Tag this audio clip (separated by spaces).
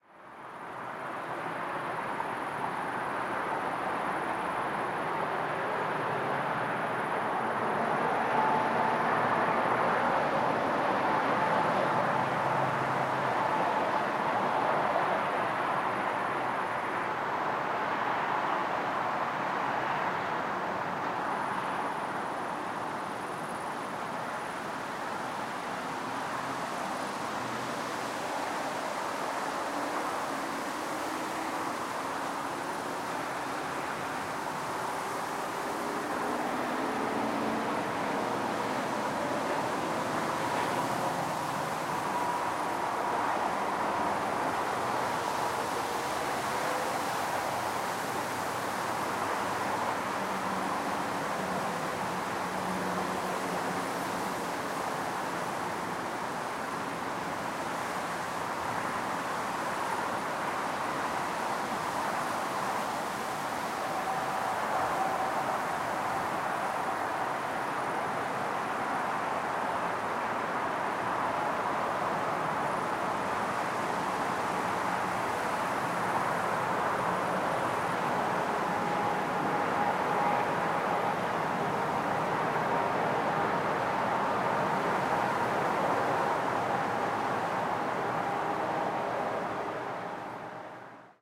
ambience Crickets field-recording R4 reed traffic wind